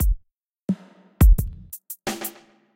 1 bar loop of a beat I'm working on